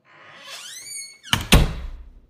The squeal of a creaky door as it closes. Recorded on iPhone 6S and cleaned up in Adobe Audition.